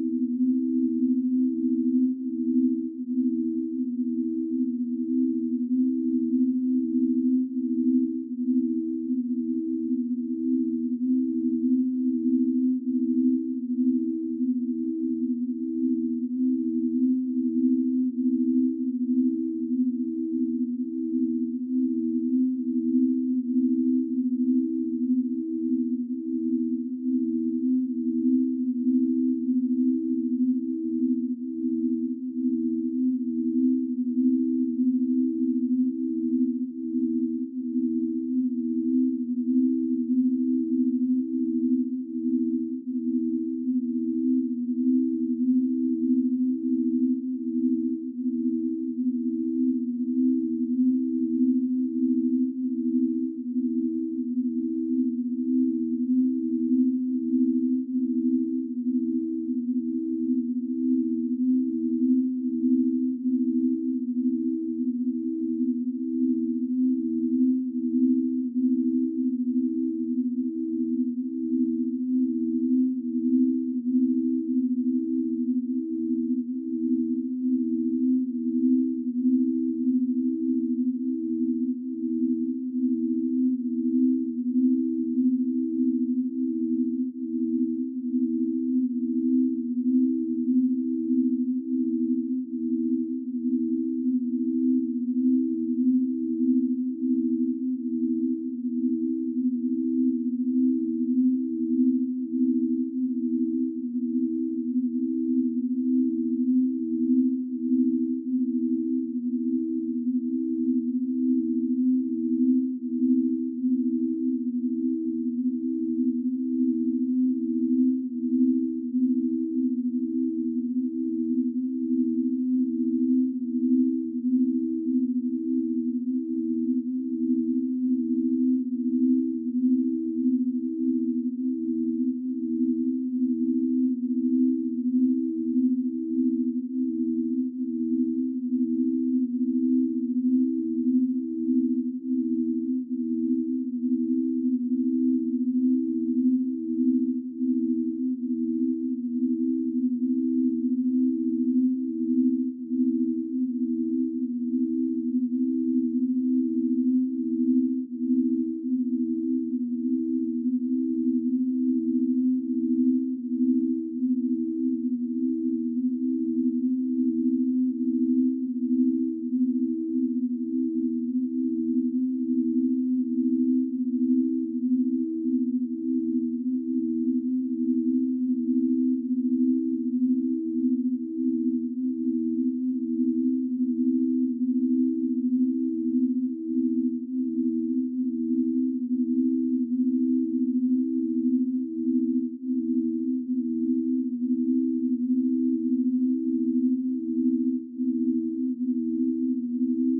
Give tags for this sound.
ambient background electronic experimental loop pythagorean sweet

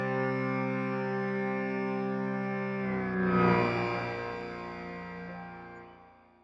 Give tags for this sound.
doppler-effect traffic road driving cars autobahn car traffic-noise passing motorway street highway doppler

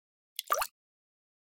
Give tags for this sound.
water-drops splash Water

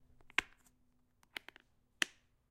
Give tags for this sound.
carry carry-case case hard OWI plastic

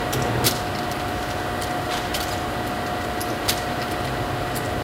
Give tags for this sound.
16mm projector

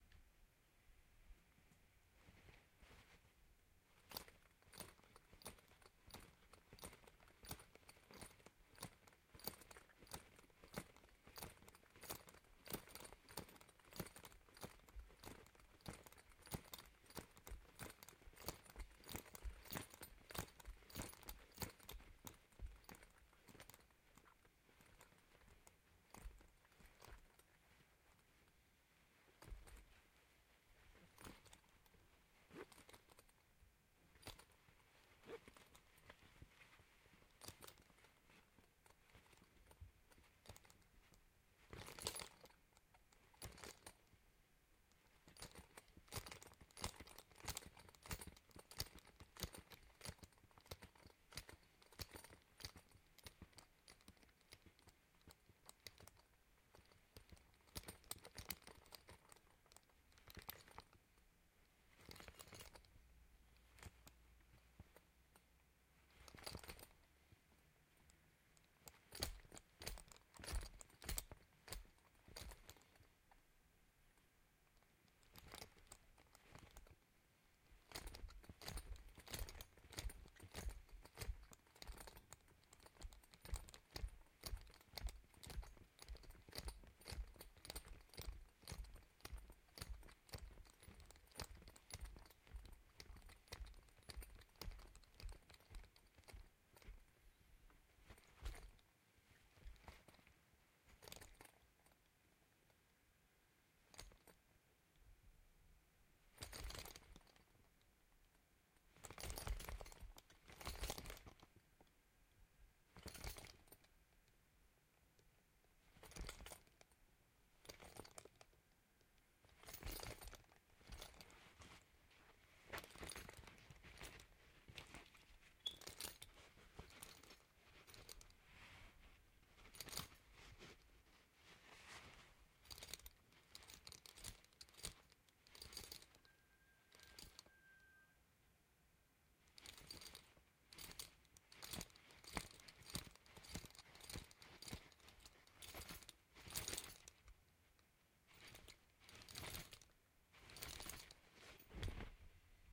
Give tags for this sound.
clinging harness horse jingle metal

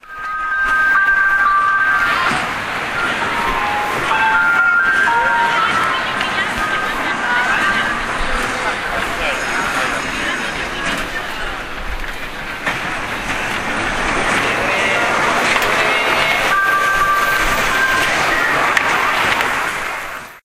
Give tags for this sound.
train kids christmas

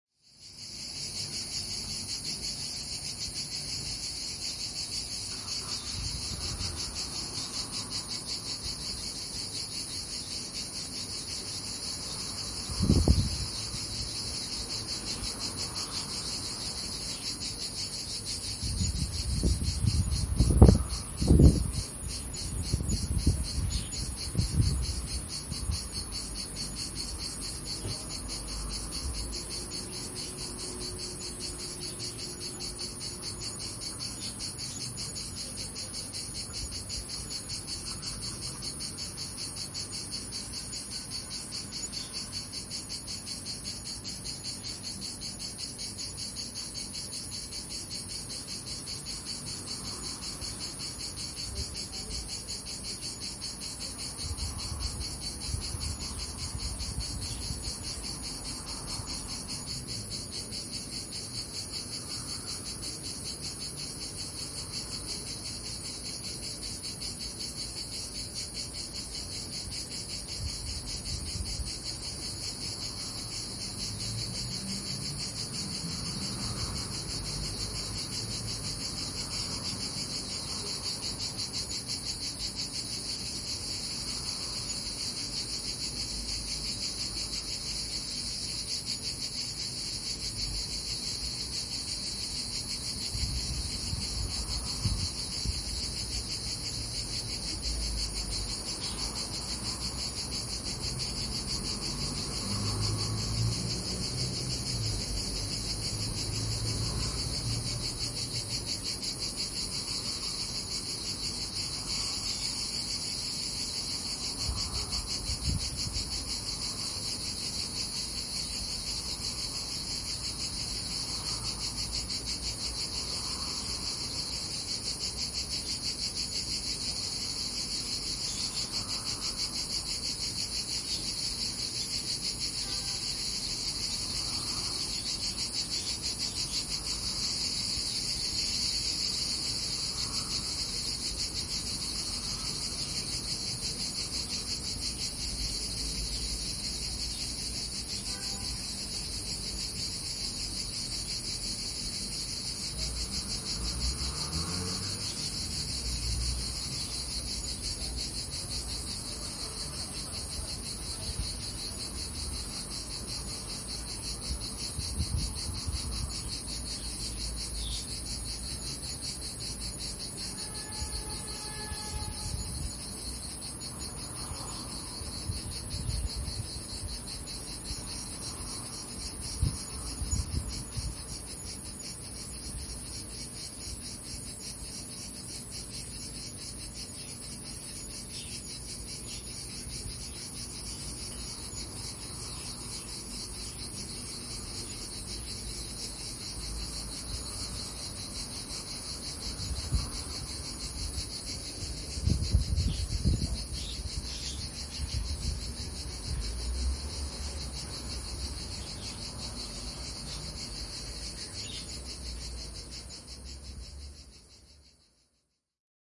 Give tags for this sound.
Afternoon Ambient-sound Cyprus Summer

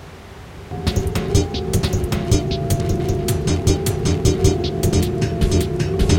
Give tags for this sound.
lockers house beats dnb stuff processed drums

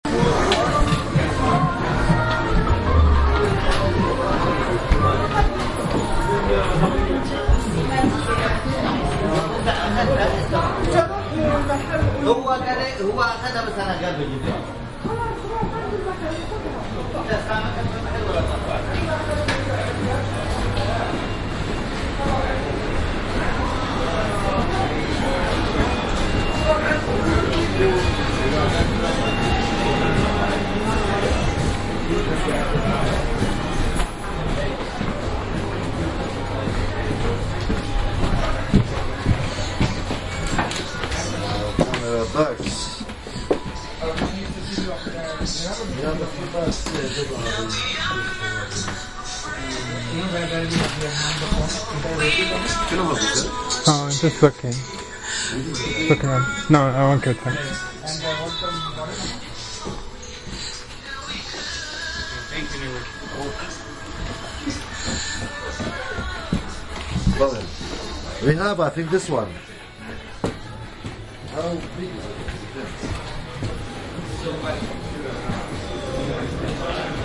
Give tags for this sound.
london atmosphere city

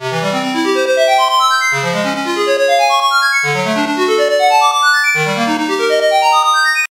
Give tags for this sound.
02 mojomills free jordan 3 mills cell-phone alert ring cell mono tone ring-tone winning ring-alert phone mojo-mills

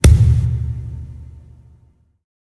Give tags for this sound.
crack fist hand hit hits human kick knuckle metal metallic metal-pipe metalpipe percussion pop ring ringing slam slap smack thump

